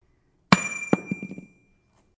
Key Drop 3
Sounds like "ping!"
steel, folly, metal